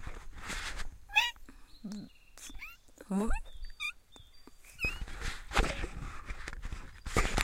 Hiroshima TM TE01 Forest
Forest, Hiroshima